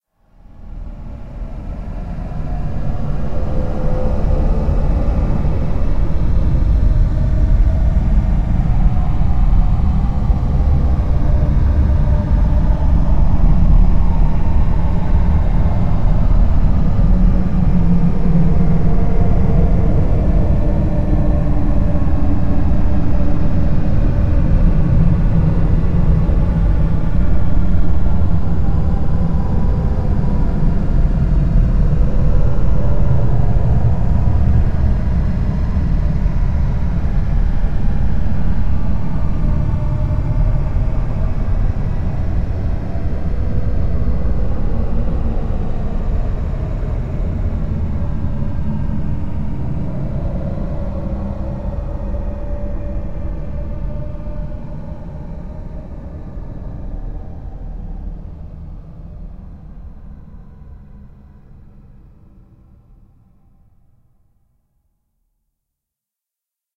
Voice Of Fire
Heavily processed sound of a recording of the crickets in my back yard.... it sounds like the voice that fire might have, if fire were to have a voice. Very windy, dark sound that builds to a bit of a climax then fades away.